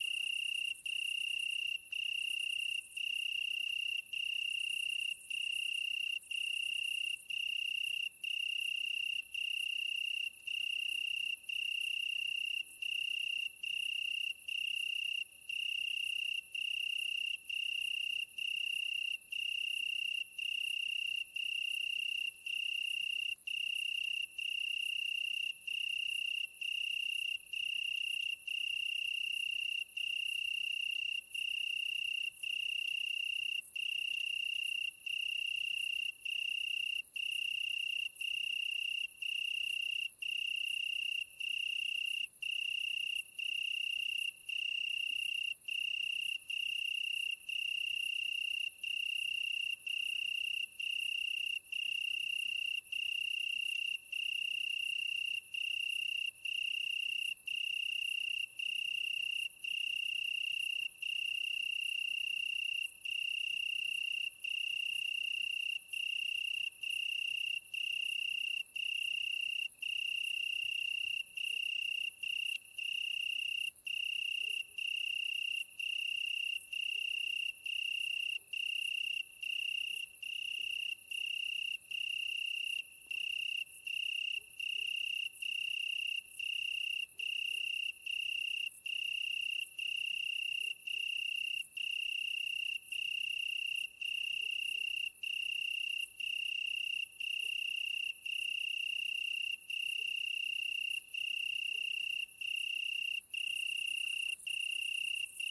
AMB-soir été,grillonRapproché
Close-up recording of a cricket, summer evening, Bourgogne
ambience, cricket, fiel, field-recording, grillon, nature, summer